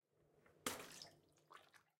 Head dunk into bathtub deeper water splash
Used a basketball to mimic a head being dunked into water. Recorded with an H4n recorder in my dorm room.